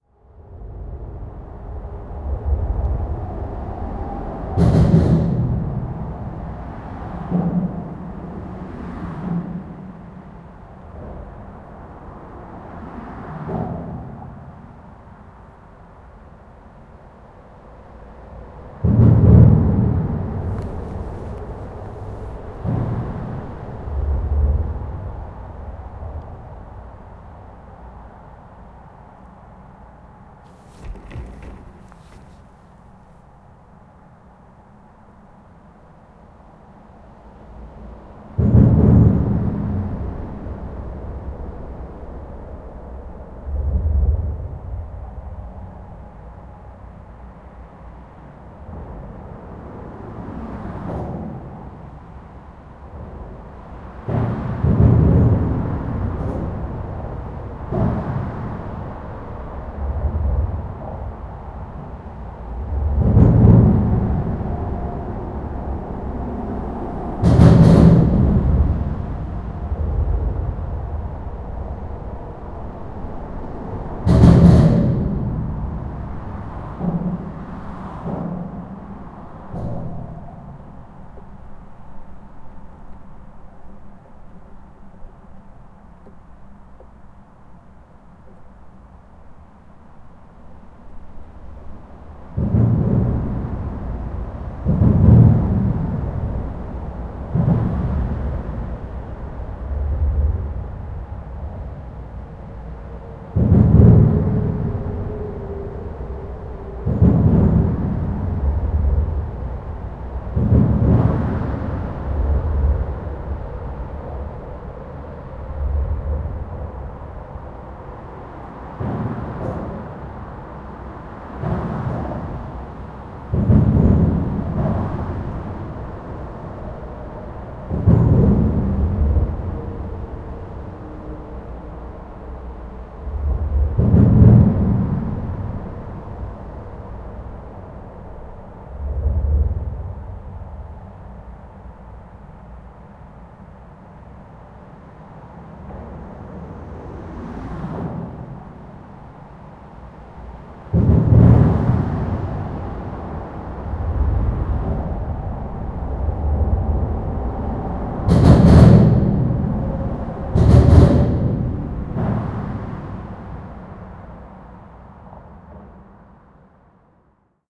23.09.2015 under motorway
23.09.2014: around 11 a.m. Sound of passing by cars. Recorded under the flyover on A2 motorway in Torzym (Poland).